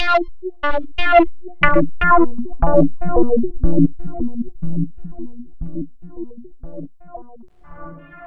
SpaceDub 006 HF hearted
More cool dub/ambient-dub SFX synthesized in Audacity.